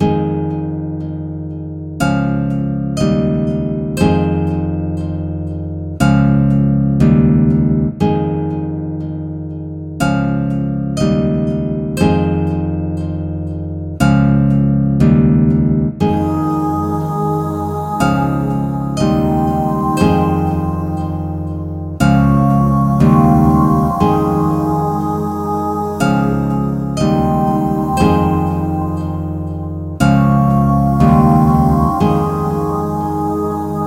a gentle loopable acoustic guitar riff. Guitar only or with 4 bit pad or lsd waves for your enjoyment! As always you can use the whole riff or any part of it to do with as you please.